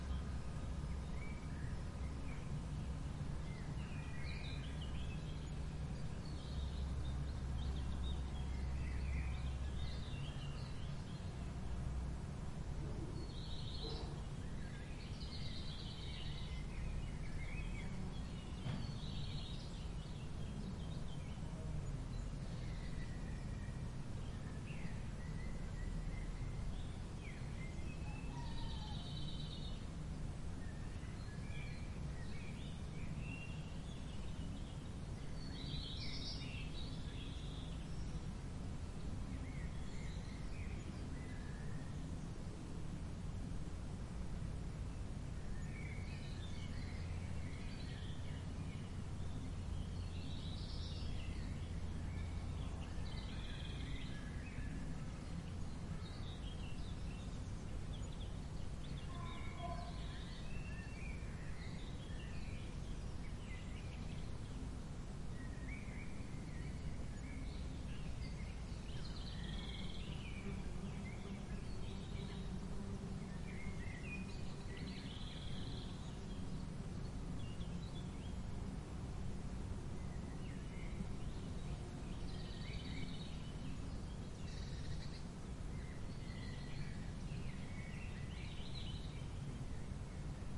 140809 Neuenburg Gate Morning F
Early morning in the gatehouse between the barbacane and the courtyard of Neuenburg Castle, located above the German town of Freyburg on Unstrut.
Lots of natural noises, but with a clearly enclosed characteristic.
Birds and a dog and a rooster from a nearby farm can be heard.
These are the FRONT channels of a 4ch surround recording.
Recording conducted with a Zoom H2, mic's set to 90° dispersion.
4ch, ambiance, ambience, ambient, architecture, atmo, atmosphere, background-sound, bird, birds, castle, early, enclosed, Europe, field-recording, Freyburg, Germany, morning, nature, Neuenburg, surround, traffic, tunnel